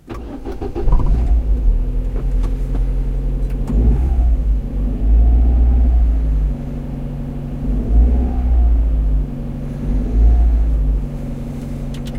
Car start 3
I recently contributed a track to a Triple LP set of krautrock cover versions ("Head Music 2", released by Fruits De Mer Records, December 2020). The song I chose to cover was Kraftwerk's "Autobahn".
If you know the track, you'll know that it uses synthesised traffic sounds alongside recordings of the same. On my version, I achieved these in three ways:
2) I got in my car with my Zoom recorder and made my own recordings of the engine starting, stopping, etc
3) I made my own sound effects using virtual synths and effects in Ableton Live 10
This particular sound falls into the second category.
car, car-engine, car-engine-ignition, car-engine-start, cars, driving, engine, engine-ignition, engine-start, ignition, road, start, starting, street, traffic, turning-over, turn-over